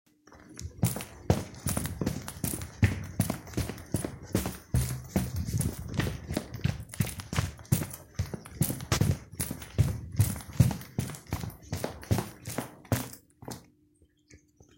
Various running steps - wood, concrete, carpet